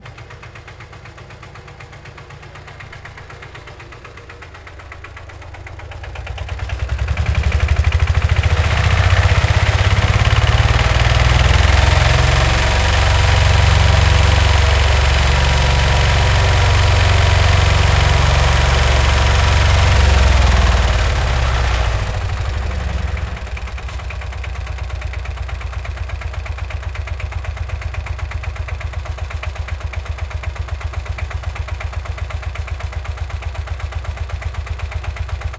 A Catarpillar recorded closely with a Zoom H4N internal Mic plus Sanken CS-3